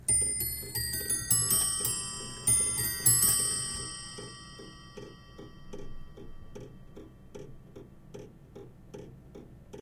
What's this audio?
Old Scots Clock - Half Hour
18th Century Scottish clock rings the half hour. This is such a sweet, unusual clock. Recorded with a Schoeps stereo XY pair to Fostex PD-6.
1, 44, antique, clock, ring, scots, scottish, stereo, tick